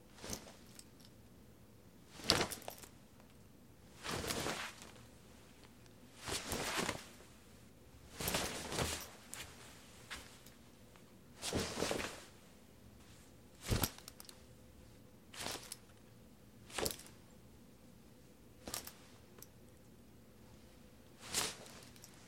Leather Jacket Wooshes
This is a collection of some sounds of quick motion in a leather jacket. It was originally recorded as a sound for a person falling in a leather jacket.
Recorded using a Tascam DR-60D and a Neewer Shotgun Mic.